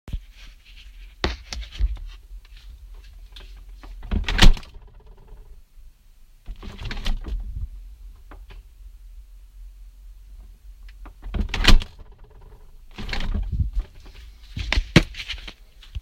Refridgerator or Car door
Me opening a refrigerator door